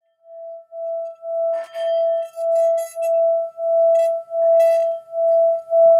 Temple singing bowl dry sample